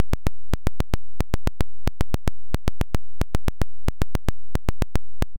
I have tried to (re)produce some 'classic' glitches with all sort of noises (synthetic, mechanic, crashes, statics) they have been discards during previous editings recovered, re-treated and re-arranged in some musical (?) way because what someone throws away for others can be a treasure [this sound is part of a pack of 20 different samples]